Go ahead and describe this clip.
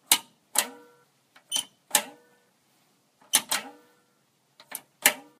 Power button on old computer
Pushing the power button on an old Gateway 2000 computer on and off. Recorded for a yet-untitled visual novel.
turn-on, turn-of, off, power, turning-on, vintage, computer, button, gateway, old, turning, turn, turning-off